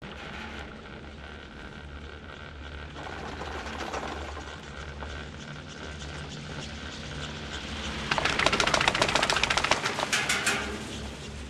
Passing Chairlift Tower
Riding through the chairlift tower.